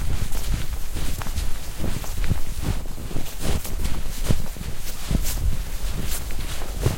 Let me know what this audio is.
springer i djupsnö
Running in deep snow. Recorded with Zoom H4.
running, deep, snow